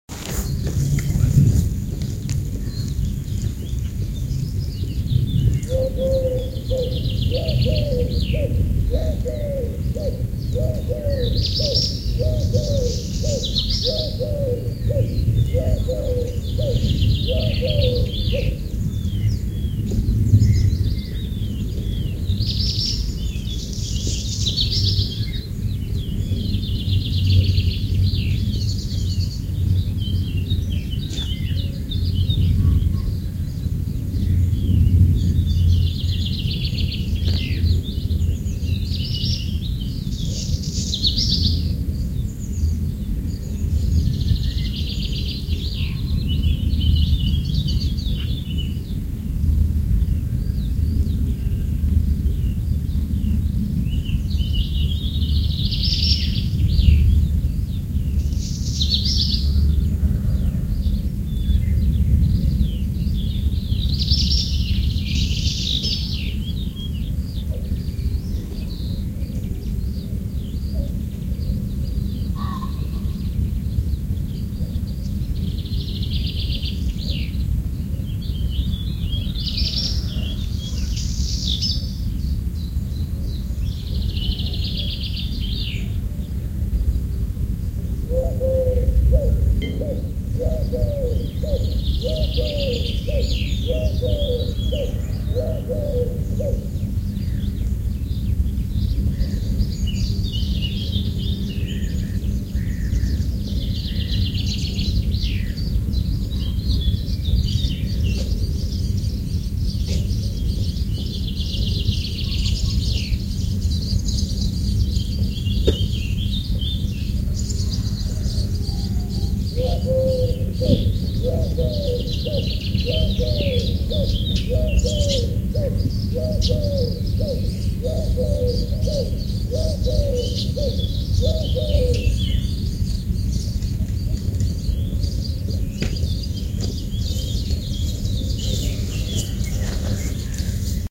Birds in forest
birds forest spring bird nature field-recording birdsong